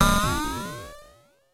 FX updown2
I recorded these sounds with my Korg Monotribe. I found it can produce some seriously awesome percussion sounds, most cool of them being kick drums.
analog, down, fx, monotribe, percussion, up